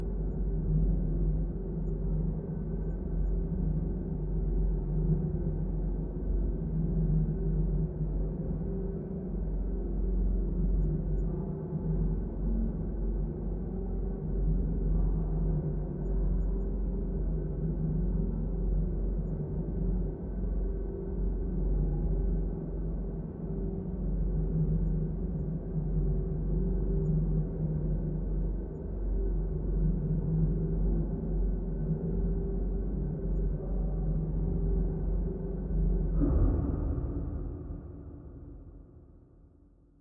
down pitched microwave sound with reverb fx chain